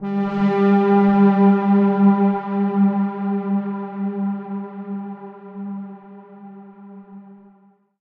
SteamPipe 7 DarkPad E4
This sample is part of the "SteamPipe Multisample 7 DarkPad" sample
pack. It is a multisample to import into your favourite samples. A
beautiful dark ambient pad sound, suitable for ambient music. In the
sample pack there are 16 samples evenly spread across 5 octaves (C1
till C6). The note in the sample name (C, E or G#) does not indicate
the pitch of the sound but the key on my keyboard. he sound was created
with the SteamPipe V3 ensemble from the user library of Reaktor. After that normalising and fades were applied within Cubase SX & Wavelab.
multisample, ambient, pad, reaktor